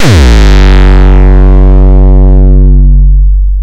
bassIndi4 (heavy)
A short Bass , Its hard , and its good for Hardcore Tracks